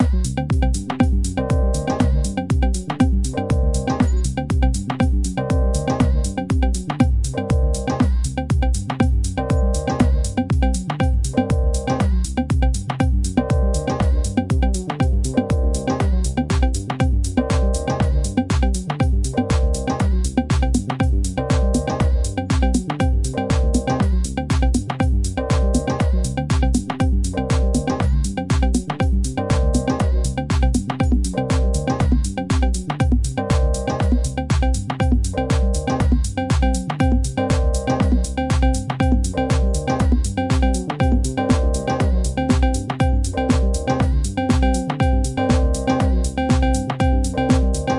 Viena - Dance loop
Sounds:Ableton live,Reason.
Sounds Download: